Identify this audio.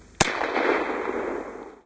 Cal .9 Gunshot
Shooting a Beretta 9mm.
9mm, beretta, cal9, explosion, gunshot, reload